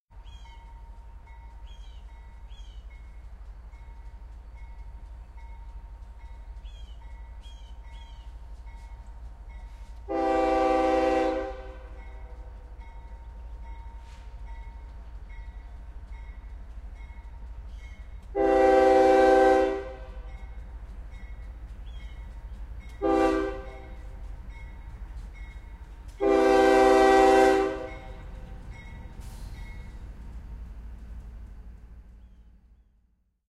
Diesel Locomotive approaches slowly, Air Horn and bells along with
engine sounds. This sample pack is numbered chronologically as edited
from the original recording: Engine approaches from left with recording
#1 and exits to the right with recording #5. Recordings are of a Diesel
locomotive approaching and mating with the rear of a freight train
outside of a wherehouse in Austin, Tx. Rode NT4 mic into Sound Devices MixPre, recorded at 16bit 44.1 with Sony Hi-Md. Edited In Cubase.